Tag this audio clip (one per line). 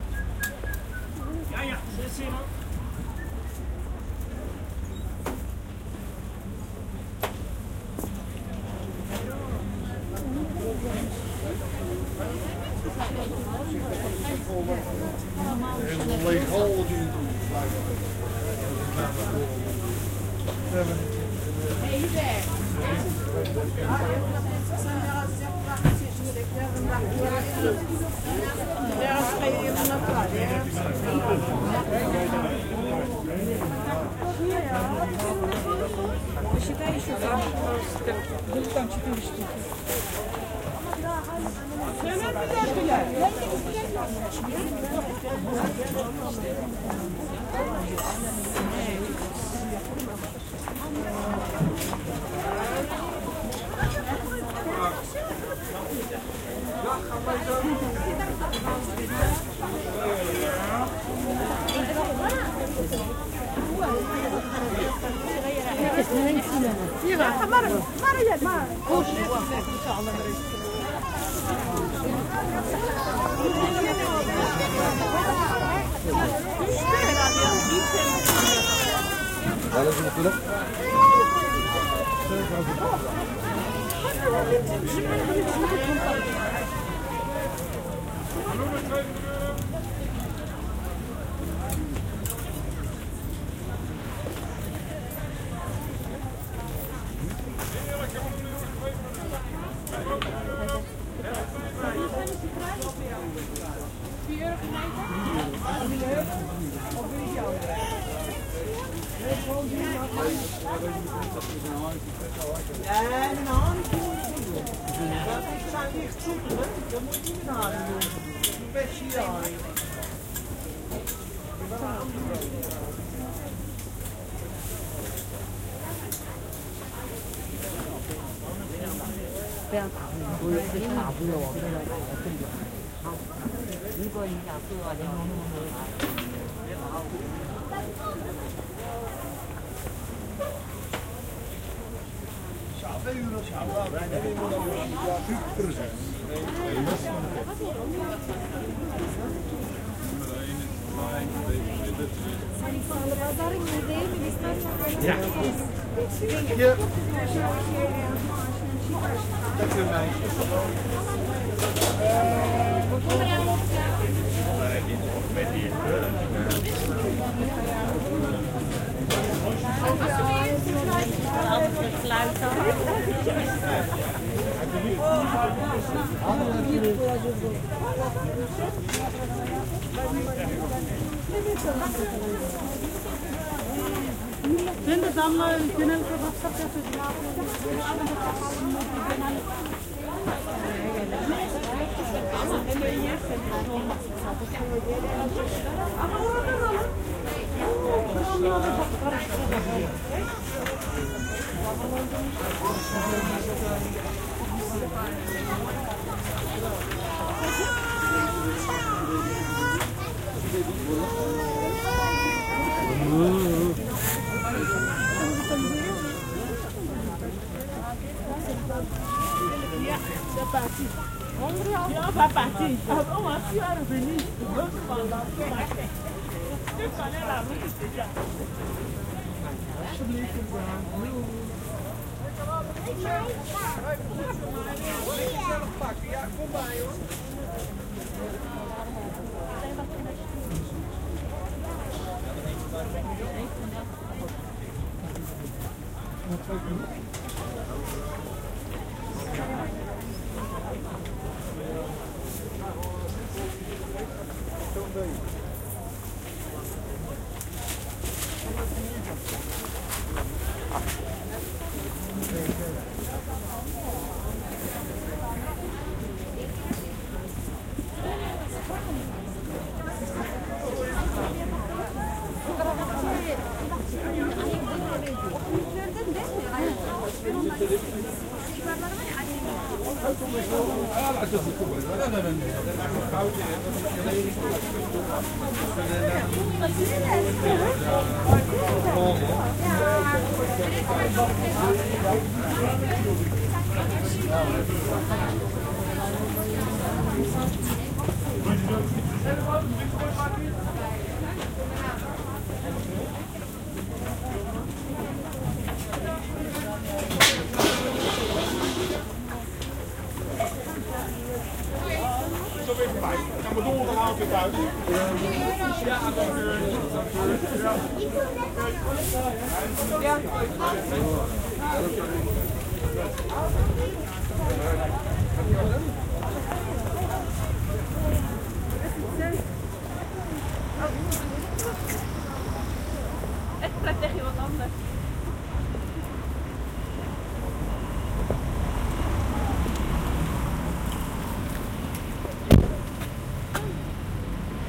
multi-cultural
turkish
cultural
dutch
netherlands
binaural
moroccan
field-recording
market
multi